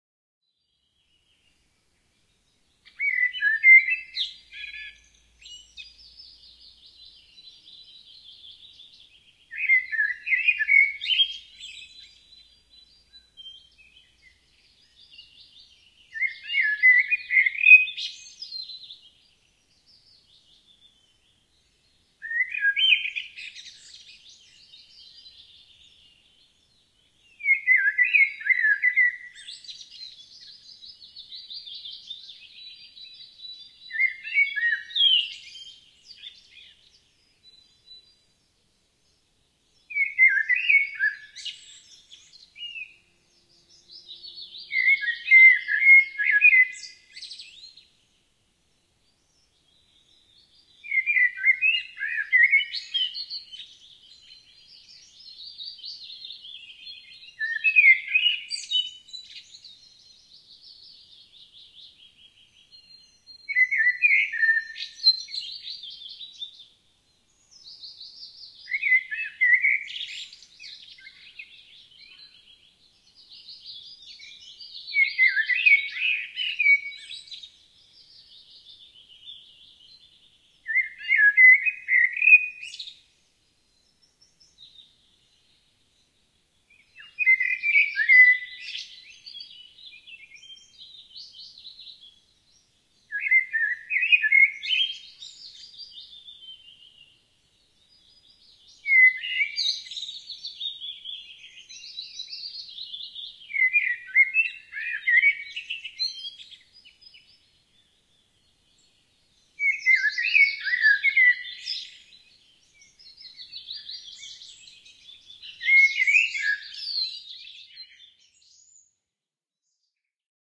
Blackbird in forest
Recorded in southern Sweden in the forest by a Zoom H2.
birds, birdsong, forest, Blackbird